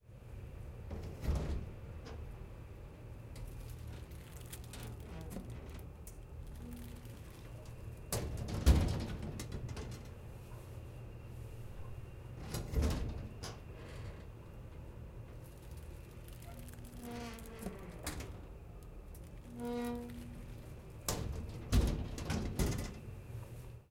Cabinet Door Open/Close
Elaine, Field-Recording, Koontz, Park